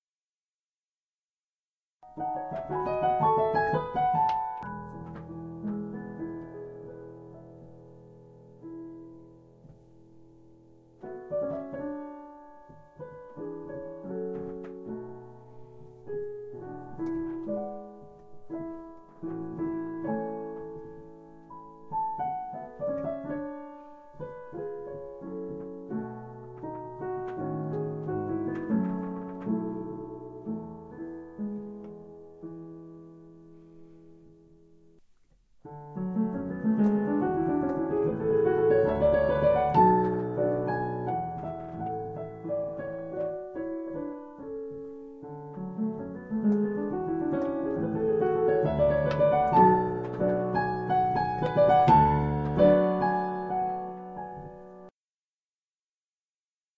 Debussy Arabesque no 1 clip 2
A short clip of a student practicing a section of Debussy's Arabesque no 1 on a Roland Digital Piano. You also hear the sound of a creaking piano bench.
practicing, music